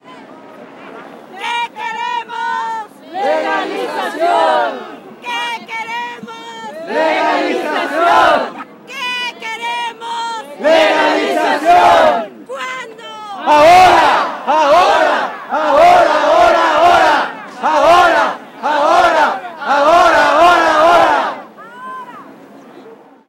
Demonstrators chanting. May Day immigrants rights demonstration in Chicago. Recorded with Sennheiser MKE 300 directional electret condenser mic on mini-DV camcorder. Minimal processing, normalized to -3.0 dB.
chanting, city, crowd, environmental-sounds-research, field-recording, human, political, voice